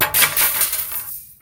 bicycle crash 3
Recorded for a bicycle crash scene. Made by dropping various pieces of metal on asphalt and combining the sounds. Full length recording available in same pack - named "Bike Crash MEDLEY"
impact, drop, clang, metal, hit, metallic, percussion, fall, bike, machinery